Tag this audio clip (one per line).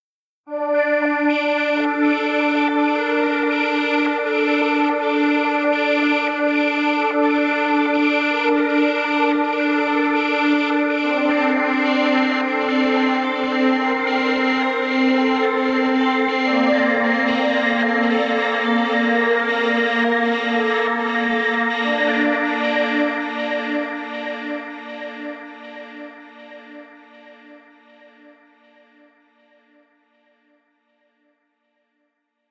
ambience
ambient
atmosphere
background
background-sound
cinematic
dark
deep
drama
dramatic
drone
film
hollywood
horror
mood
movie
music
pad
scary
sci-fi
sfx
soundeffect
soundscape
space
spooky
suspense
thiller
thrill
trailer